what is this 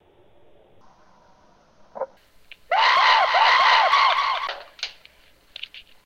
Flowers Like to Scream 06
vocal, not-art, screaming, stupid, psycho, very-embarrassing-recordings, noise, yelling